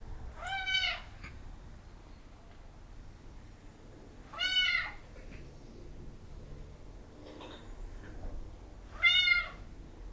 garage cat 02
Recorded in my garage. A 4 month old kitten meowing at me for more attention. There is some background noise from outside.
This was recorded with a Sony minidisc MZ-R30 with binaural in-ear microphones.
background-noise, garage, cat, kitten, meow